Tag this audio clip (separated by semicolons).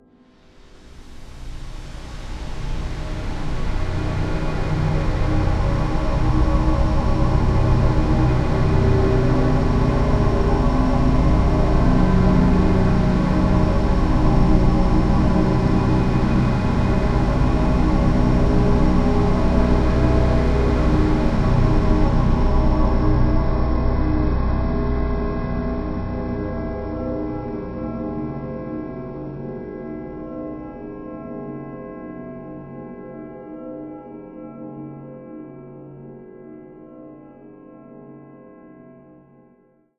soundscape; cinimatic; dusty; pad; space; multisample